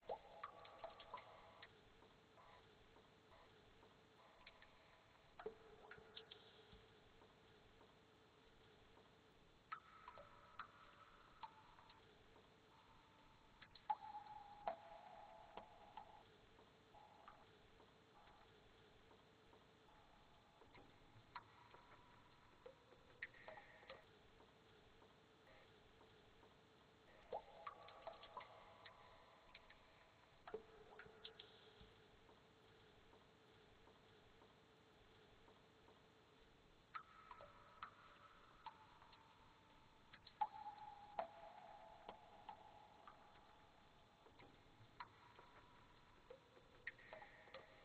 background noise for cave